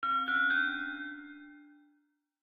discover/mystery sound
cute, mystery, mysterious, adventure, sound, videogame, discover, item